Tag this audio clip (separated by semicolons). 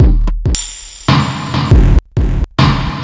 loop; massive; experimental; jovica; remix